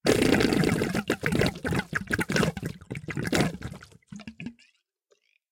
suck in 4
various sounds made using a short hose and a plastic box full of h2o.
sucking, bubbles, liquid, suck, blub, drip, gurgle, bubbling, wet, water, bubble